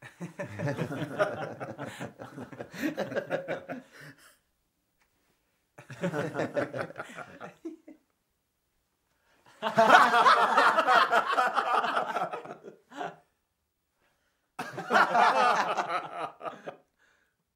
Interior vocal (French) ambiences: laughs